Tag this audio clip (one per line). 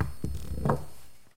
kick
rub